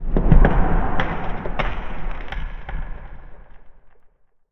building collapse03 distant clatter
made by recording emptying a box of usb cables and various computer spares/screws onto the floor then slowing down.. added bit of reverb
rubble, building, collapse